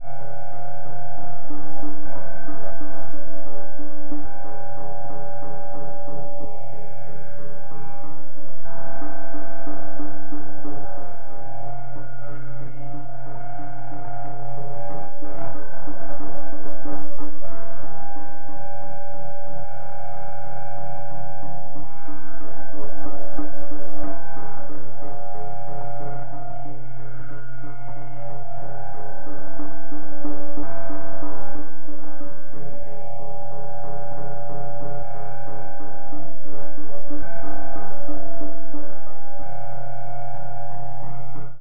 A rhythmic, mysterious sound including a hypnotic, incessant drum beat. Sample originally generated using a Clavia Nord Modular and then processed via software
percs, beat